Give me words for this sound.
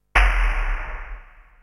industrial low flash11
industrial low flash
low, flash, industrial